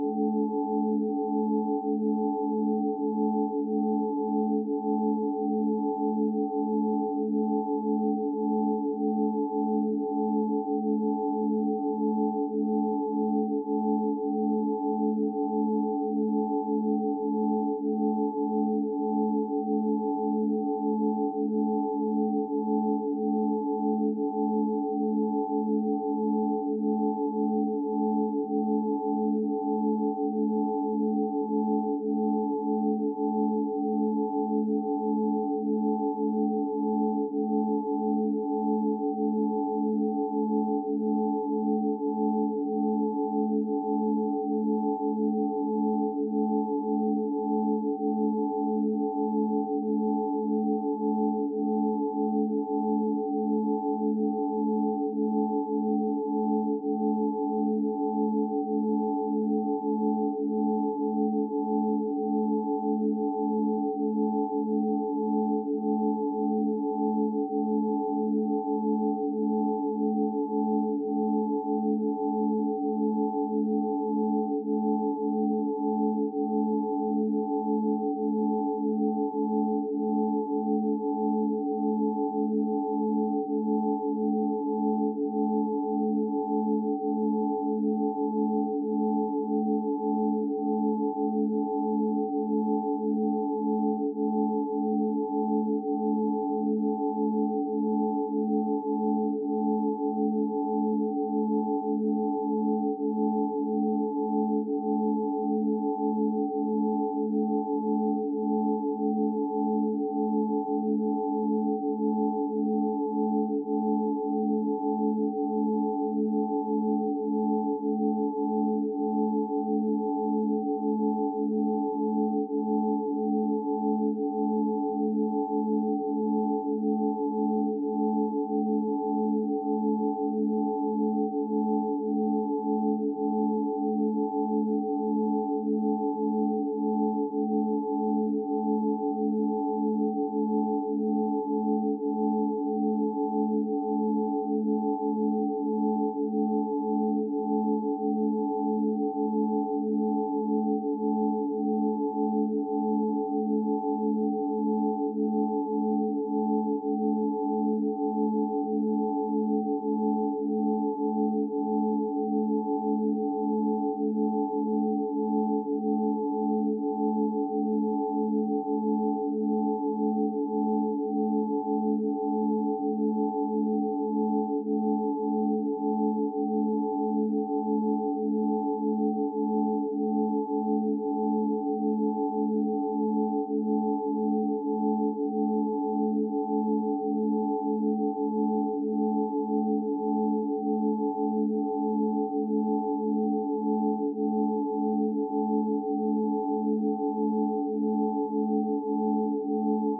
Imperfect Loops 11 (pythagorean tuning)
Cool Loop made with our BeeOne software.
For Attributon use: "made with HSE BeeOne"
Request more specific loops (PM or e-mail)
ambient, electronic, experimental, loop, pythagorean, sweet